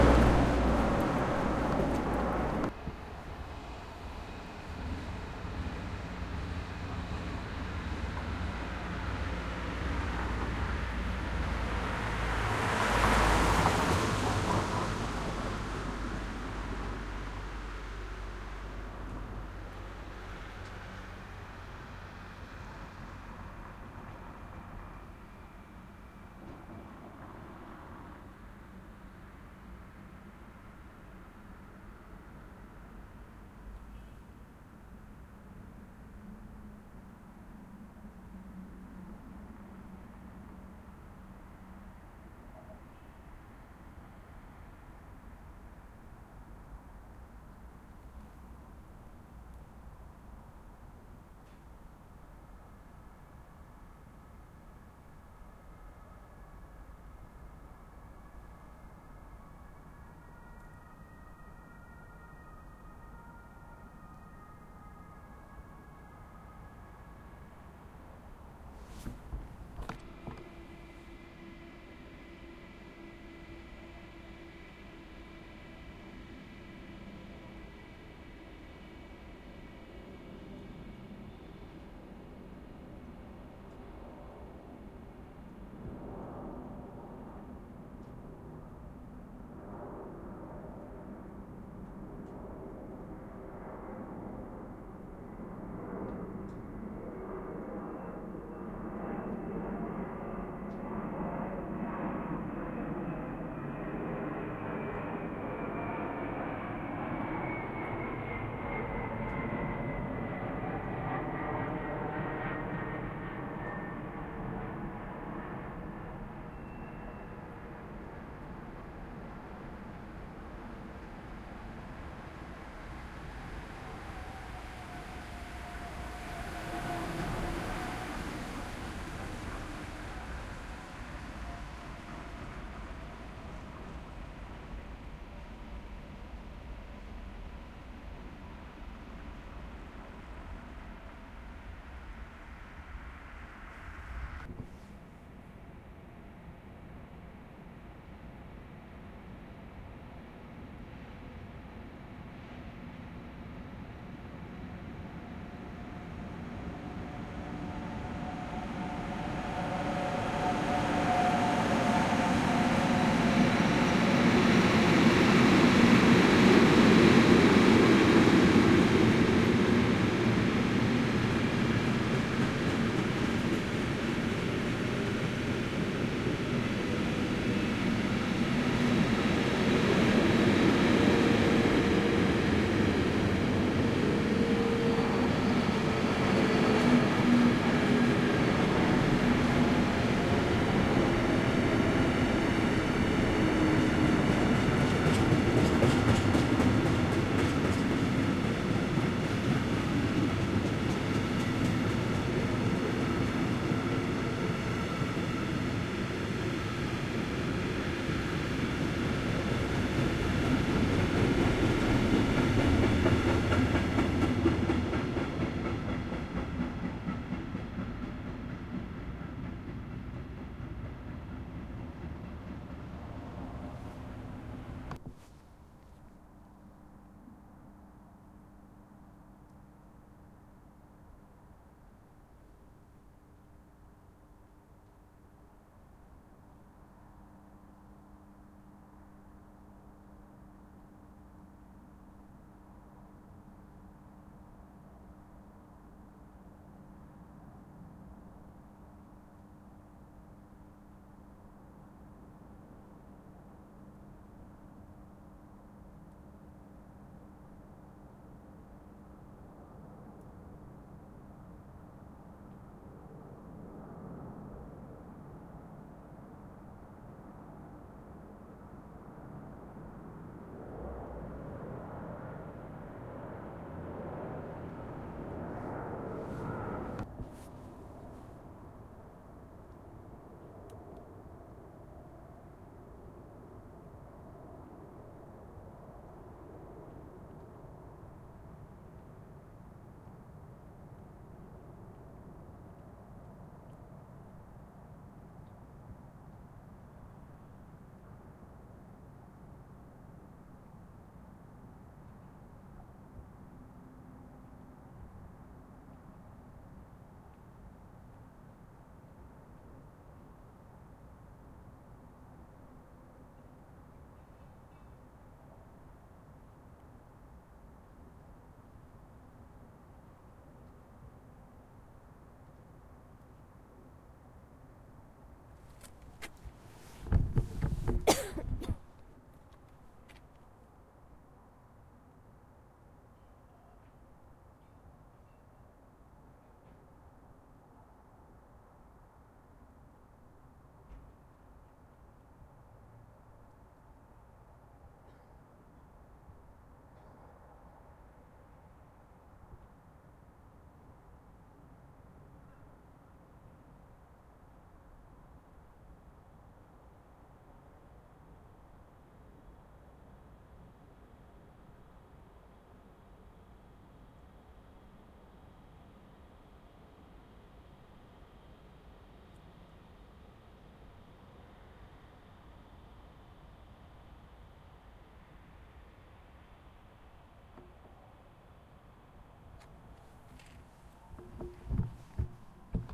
Ambience from Buchheim, Köln on a calm night. There comes an ambulance on the distance, a bit of traffic, a plane passes by close and also a train.